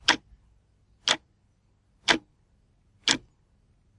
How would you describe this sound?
Wall clock tick tack sound recorded, looping perfectly.
Recorded with a Zoom H2. Edited with Audacity.
Plaintext:
HTML:
battery, battery-powered, cheap, chronos, clock, clockwork, loop, looping, plastic, tac, tack, tic, tick, ticking, ticks, tick-tock, time, wall-clock